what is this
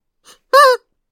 Squeaky dog toy 008
A single squeak from a rubber dog toy with a little rushing air sound